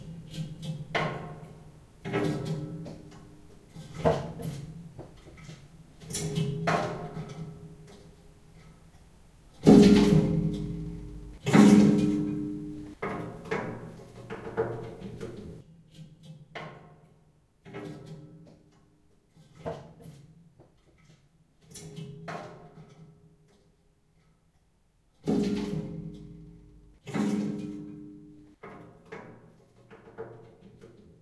Weird Radiator
I have an old radiator that runs on gas. Sometimes it makes weeeird noises.